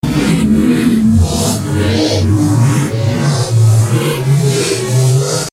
the siths
reminds me of science fiction, it is a drum fill, processed beyond recognition.
pattern, loops, loop, ominoue, electronic, distortion, processed